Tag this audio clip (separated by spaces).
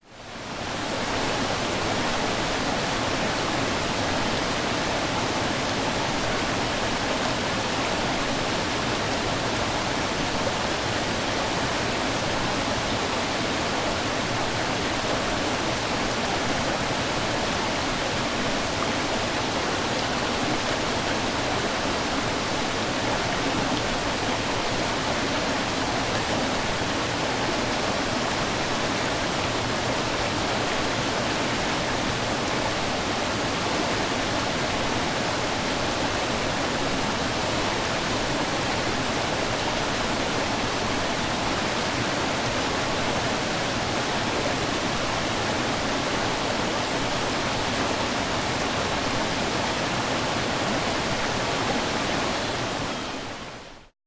falls krka stream